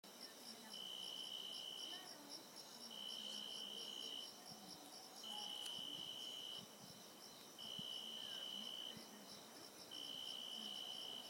Crickets at night (1)
Crickets at night.
Location: Nono, Cordoba, Argentina.